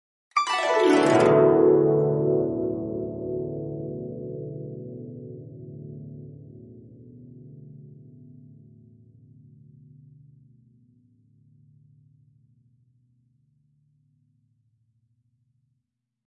For flashbacks, vibraphones, dreams, etc
dream, flashback, vibraphones